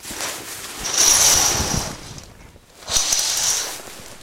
Curtains Opening or Closing
I'm opening or closing curtains on a rail. Recorded with Edirol R-1 & Sennheiser ME66.